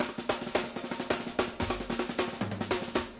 Unprocessed break loops used to create sequenced patterns in the track "incessant subversive decibels"